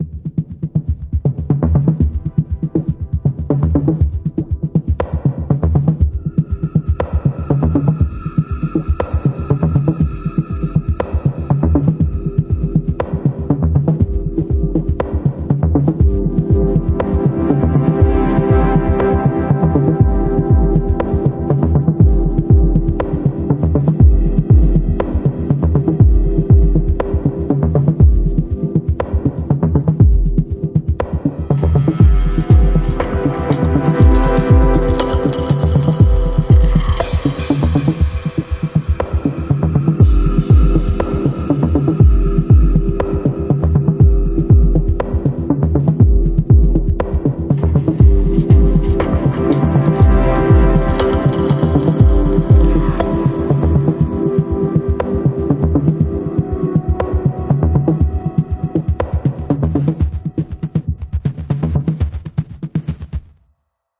Loopable Interference 120BPM
I made this arp based construction kit (there's like 4 parts you can split, rearrange and make almost an entire song out of it) with no specific purpose in mind. Maybe you guys could give it purpose for me?
construction, arpeggiator, kit, loop, chillout